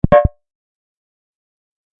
Error Sound
UI sound effect. On an ongoing basis more will be added here
And I'll batch upload here every so often.